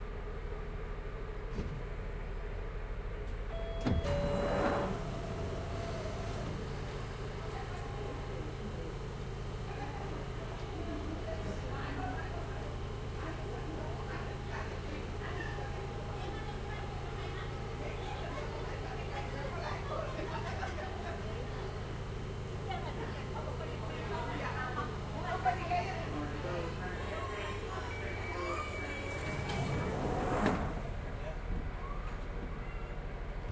Tube Underground Train Opening and Closing
Recording from inside of a tube train, opening and then shortly after, closing. Recorded from Swiss Cottage